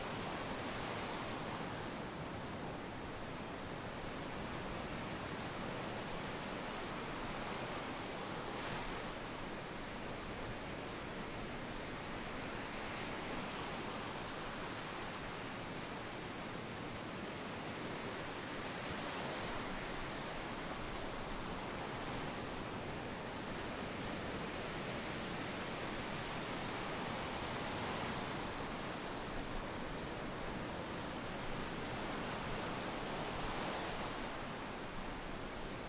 Recorded in Destin Florida
Close-up of waves rolling onto shore.